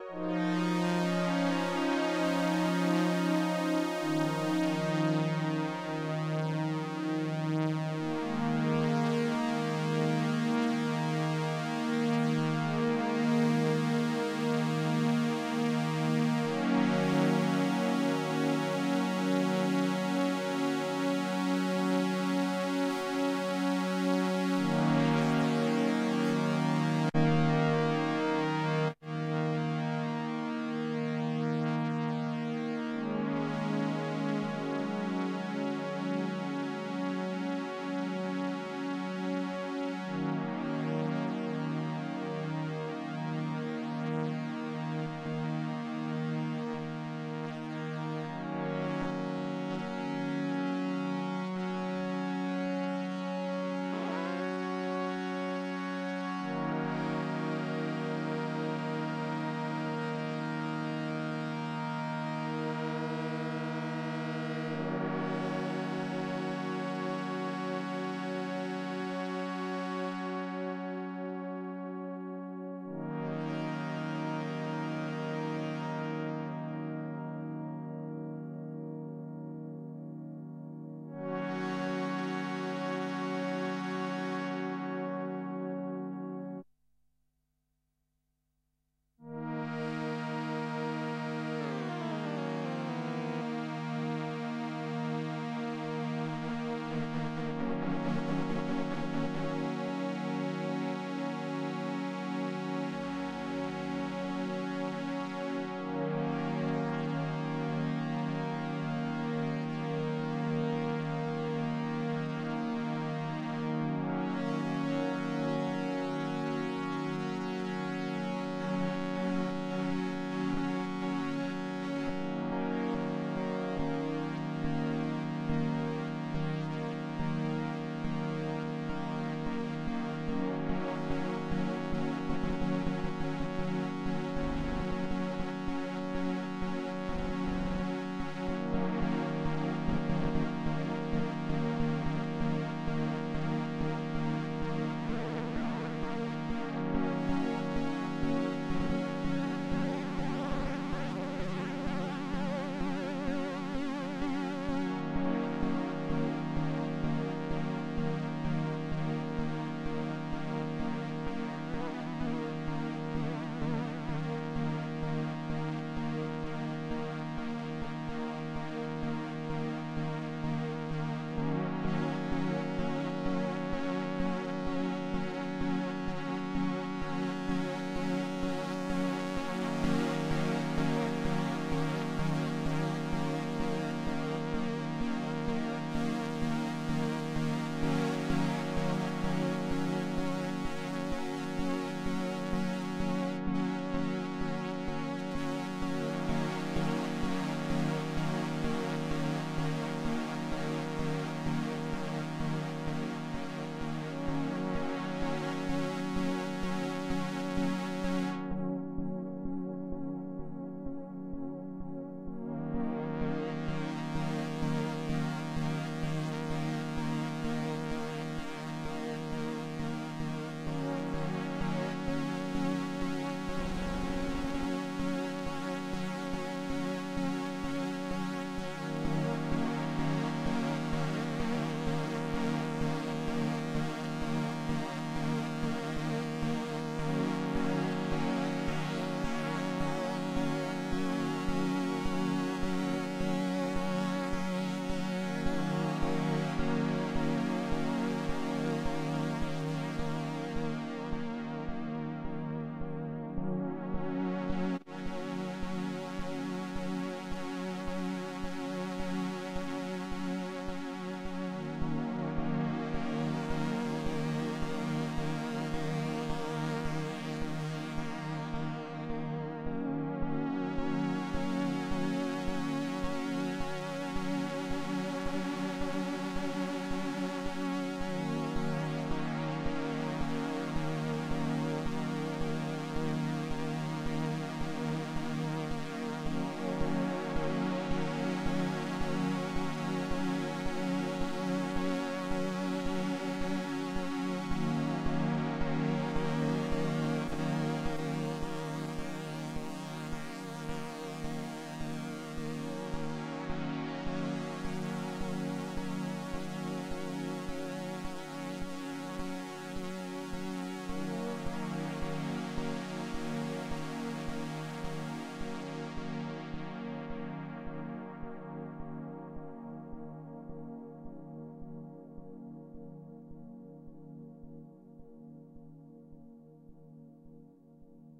Dystopian Oberheim chords
Dystopian city scape vibe played on Oberheim OB8 analogue synthesizer.